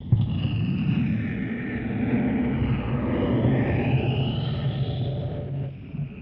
FTZ GC 107 SS08
Intended for game creation: sounds of bigger and smaller spaceships and other noises very common in airless space.
How I made them:
Rubbing different things on different surfaces in front of 2 x AKG C1000S, then processing them with the free Kjearhus plugins and some guitaramp simulators.
Warp, Warpdrive, Phaser, Spaceship, Outer, Space, Game-Creation